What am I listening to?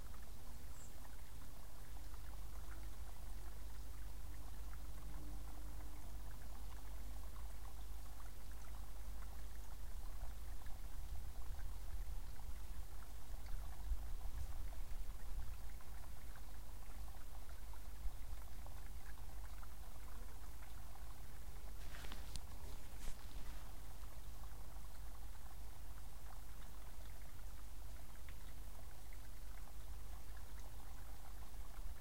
stream bubbling (loop)
loop of a small bubbling brook
ambience, stream, water, woodland